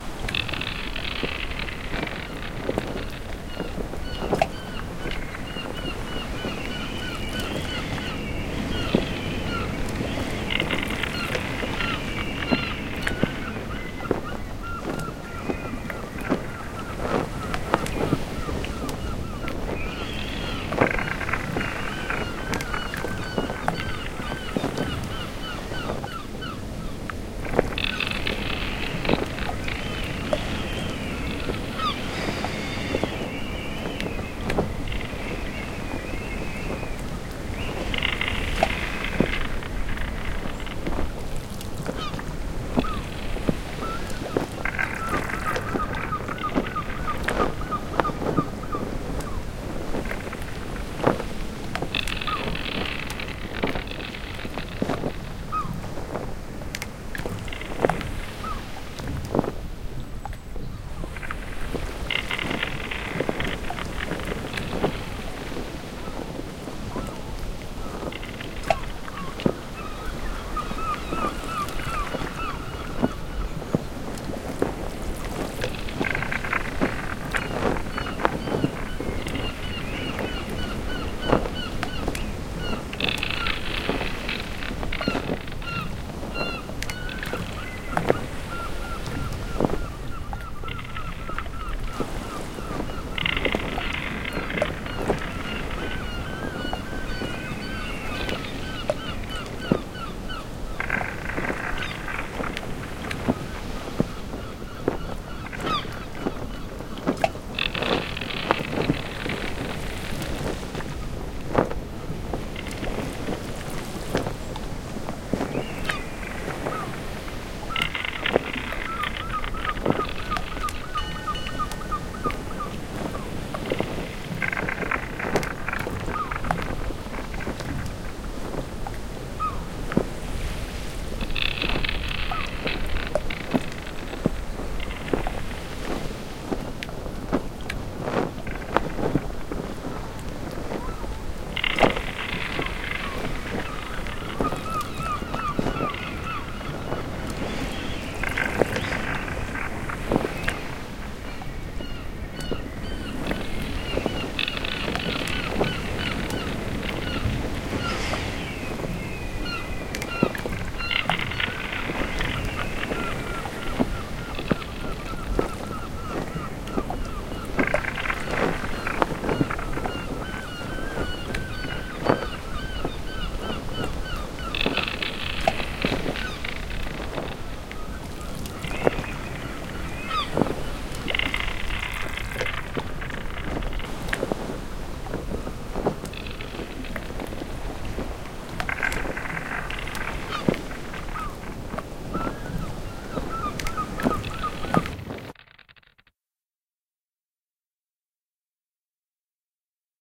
I made this to have some old-time ocean-going ambiance. Included are:
38956__acclivity__UrbanHerringGulls
67277__acclivity__TsunamiBeach
39900__aesqe__sea_water_churning_near_boat_01
39901__aesqe__sea_water_passing_through_pier_hole_01
90734__ascensionseries__ocean24_bit
84111__Benboncan__Wind_On_Door_Short
80079__Benboncan__Cotton_Flapping
77699__Benboncan__Two_Bells_Ship_Time
87280__Timbre__Creaking_1
(There is a second file, "on a wooden ship at sea 02" which emphasizes ocean sounds more.)